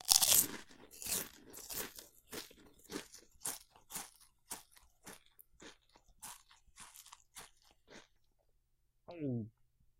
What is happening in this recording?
Just the sound of myself chewing some chips and then swallowing the product.
fried, chew, pringles, mouth, crisps, potatoes, crunch, lays, chips, crunchy, eating, chip, chewing